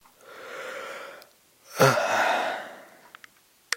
A person yawning tiredly.